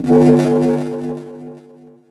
femhellovocodedel33Dslow96
Sound from phone sample pack vocoded with Analogx using ufomonoA4 as the carrier. Delay added with Cool Edit. 3D Echo chamber effect added then stretched.
3d, delay, female, processed, stretched, vocoder, voice